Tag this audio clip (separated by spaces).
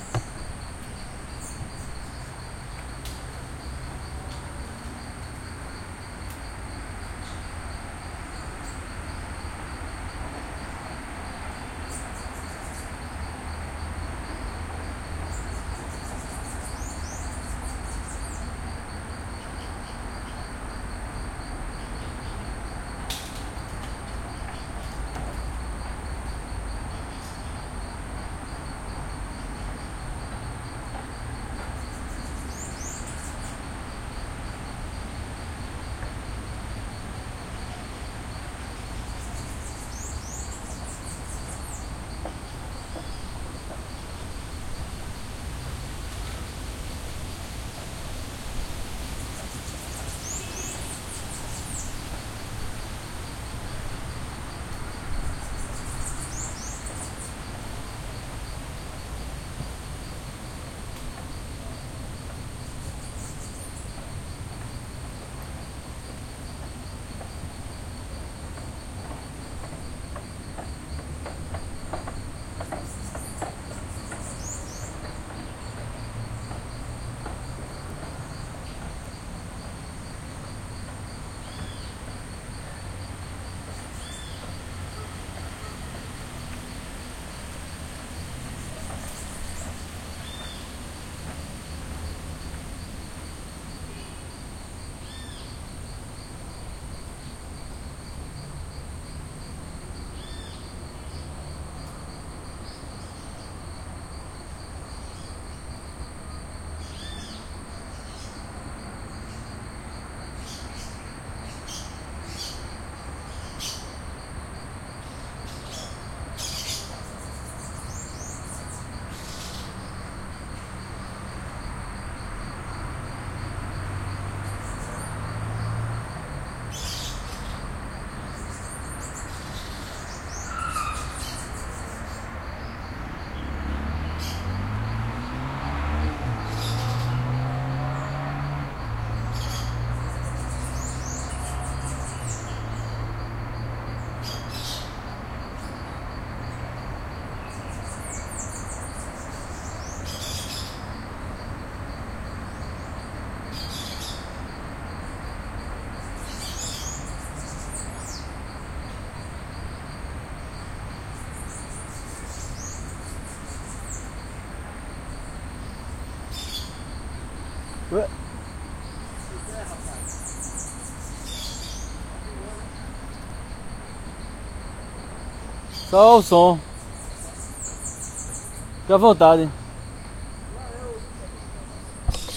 Field Park playground Recording